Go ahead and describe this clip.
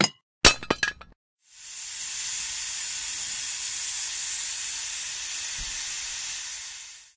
Dropping a smoke bomb on the ground
Mixed together from these three sounds:
grenade, smoke-bomb, smoke, bomb, knockout-gas, leak, smoke-grenade